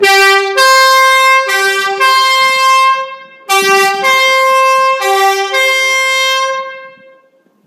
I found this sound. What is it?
A fireman's siren. Recorded during a interview in a fire department with Nokia N95.
car, fireman, siren